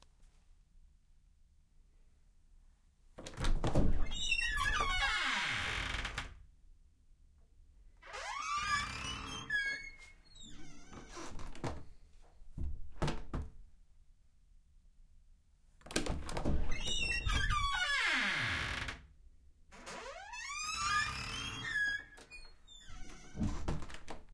squeaky door with closing and opening
this is the sounds of a door that needs to be oiled at my house, it is a combo of the door opening slowly, clicking, closed and the actual erie tone.
click, door, erie, high, long, natural, pitch, scary, sustain